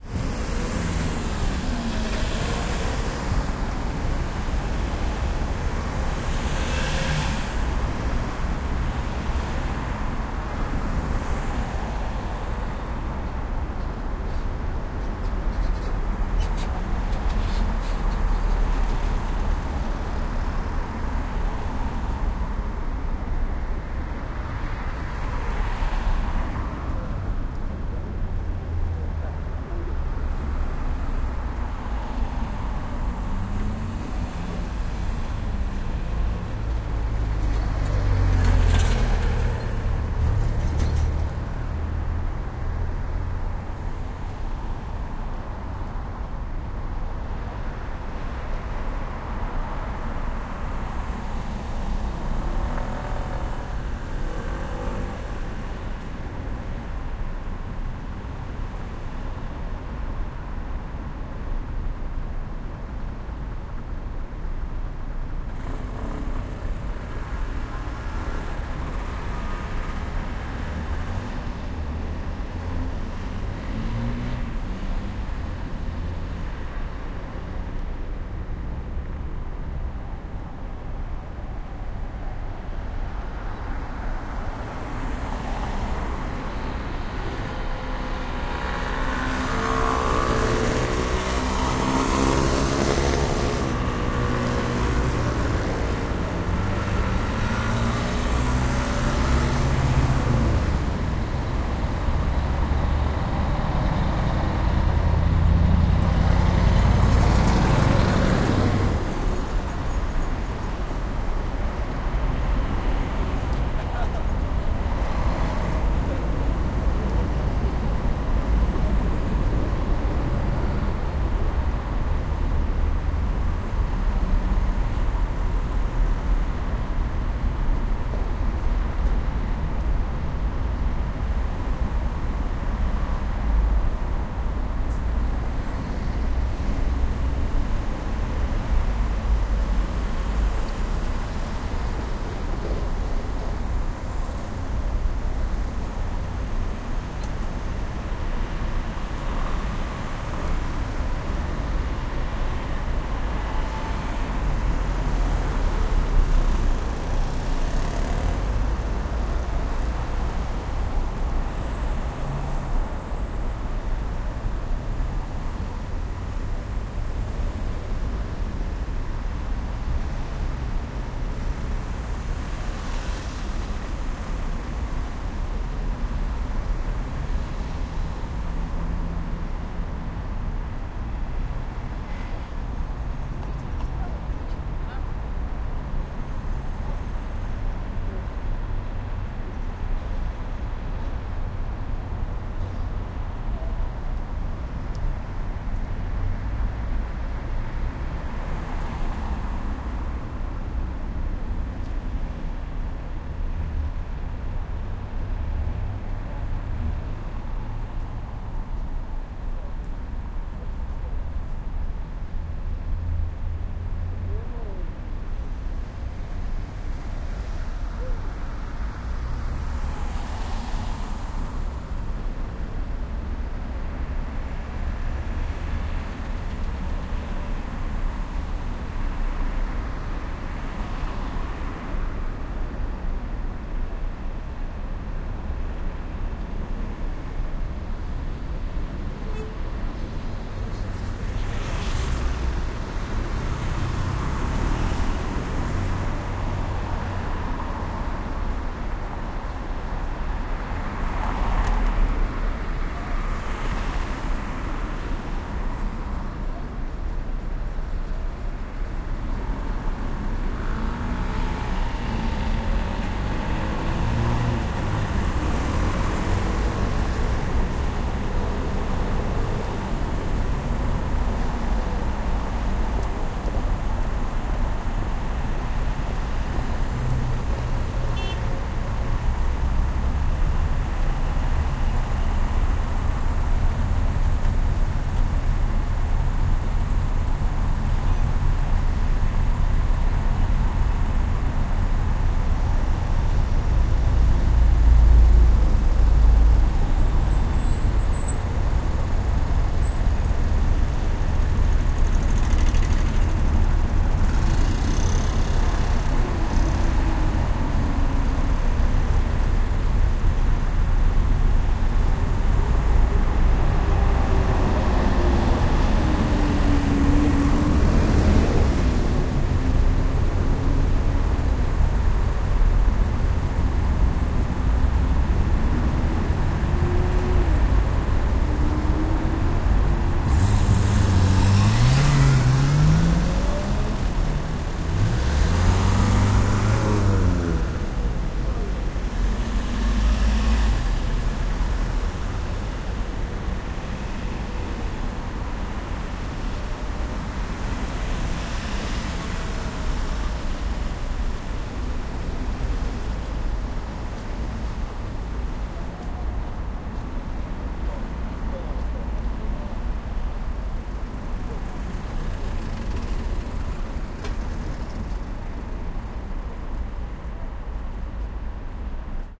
0110 Traffic passing by
Traffic passing by and in the background
20120118
traffic, field-recording